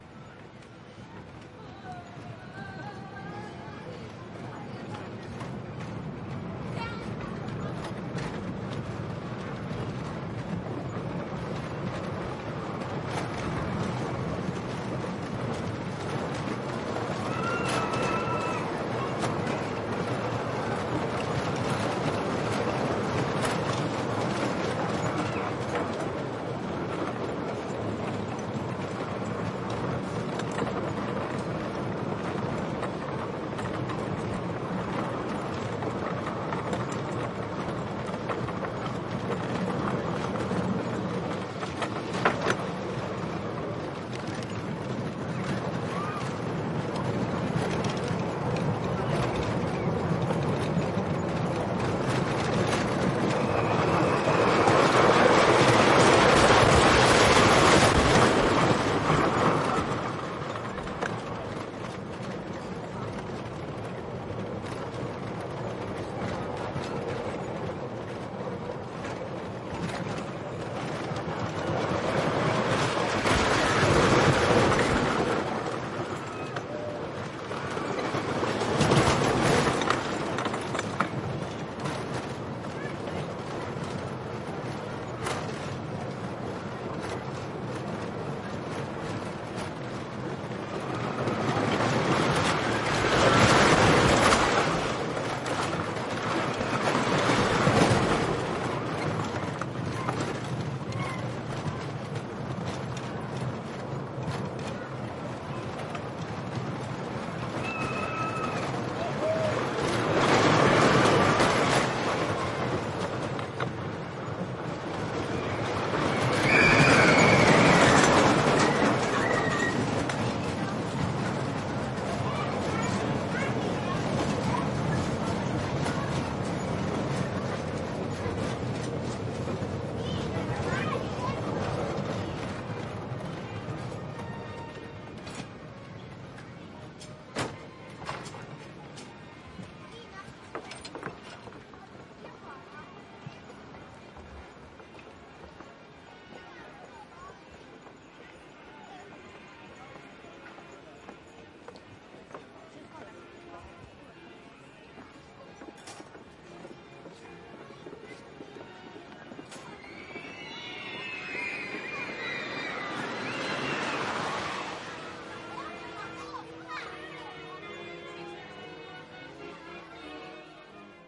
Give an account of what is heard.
Roller coaster in amusement park // Vuoristorata huvipuistossa, Linnanmäki
Recorded in rollercoaster van.
Lähtö, ajoa mukana vaunussa, kolinaa, välillä kirkumista. Lopussa poistuminen. Toiset vaunut menevät ohi kolisten.
Paikka/Place: Suomi / Finland / Linnanmäki / Helsinki
Aika/Date: 1979